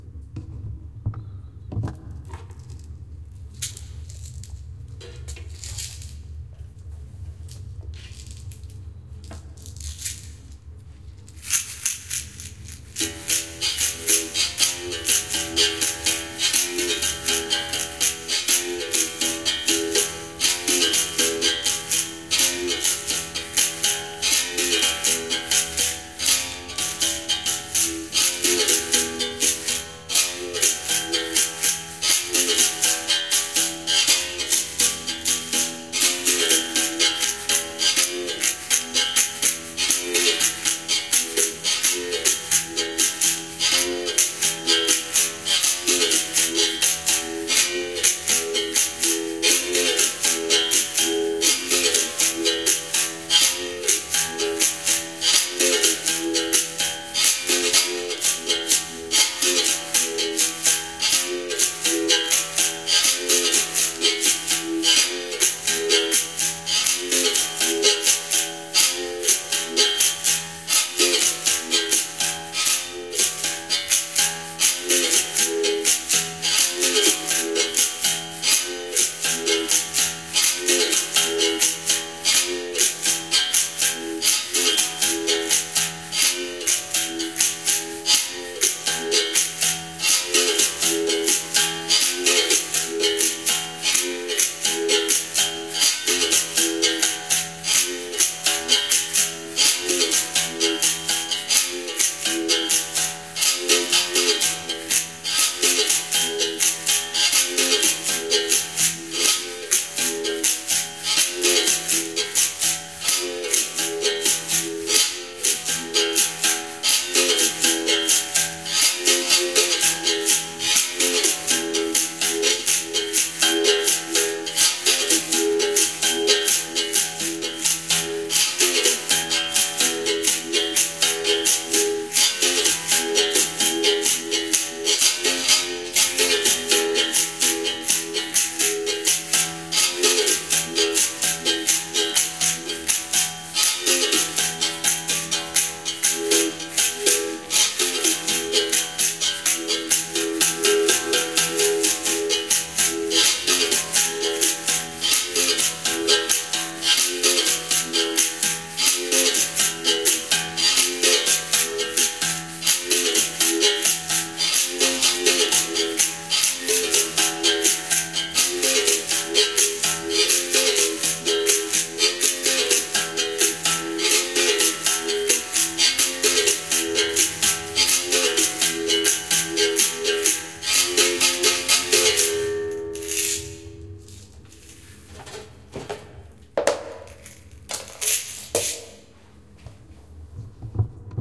I've record my play on berimbau for some time. Recorded on Tascam Dr07MKII.
berimbau, string